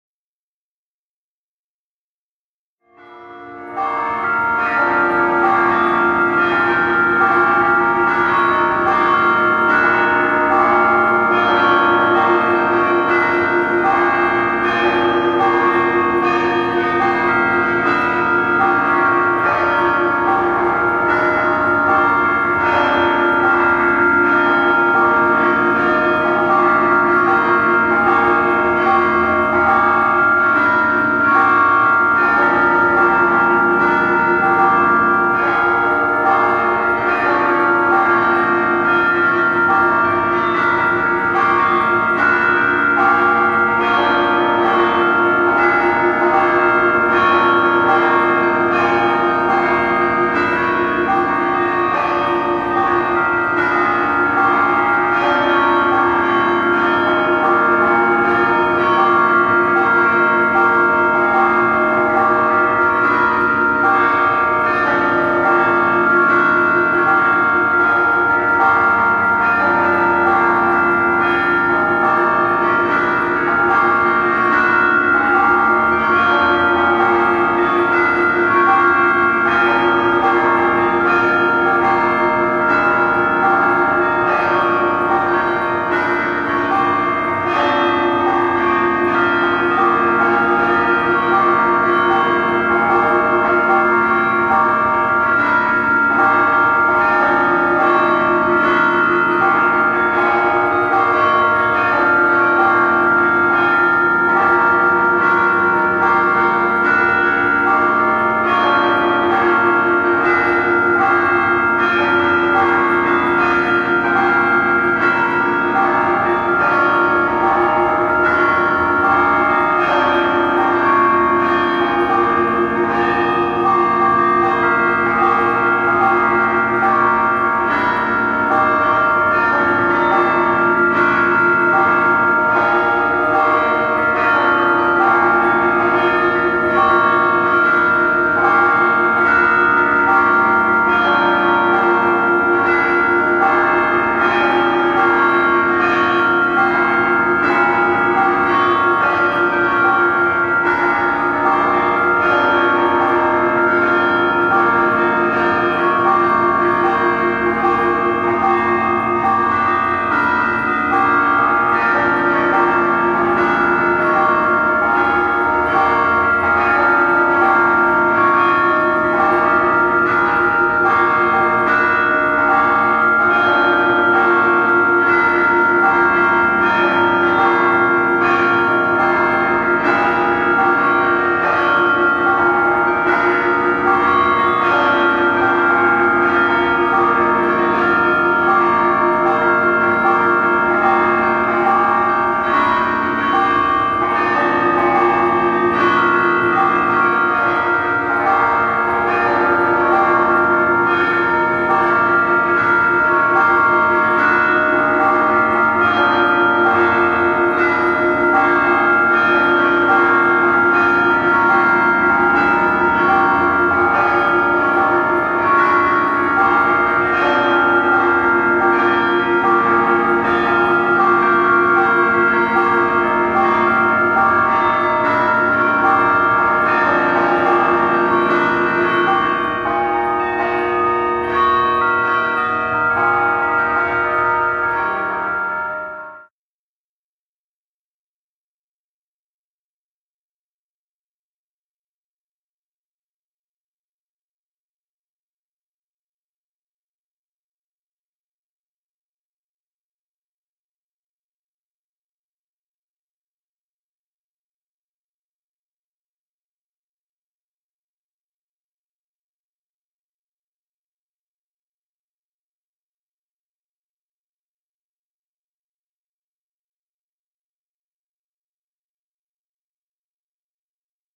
designed to be used as a supplement for the stage chimes for a live performance of the 1812 Overture
1812, bells, chimes, church